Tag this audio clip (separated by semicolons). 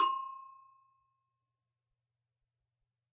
metalic; bell; ghana; percussion; gogo